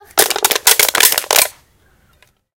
mySound WBB Amber
Sounds from objects that are beloved to the participant pupils at the Wijze Boom school, Ghent
The source of the sounds has to be guessed, enjoy.
belgium, cityrings, wijze-boom